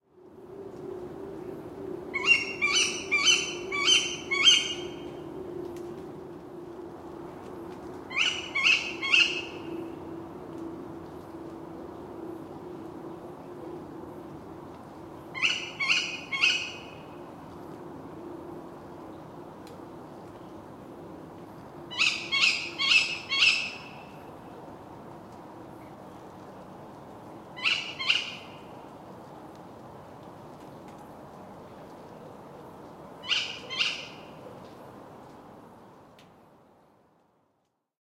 Tawny Owl - Female
A night field-recording of a Female Tawny Owl.
Tawny, Owl, Night-time, Kewick, Ambience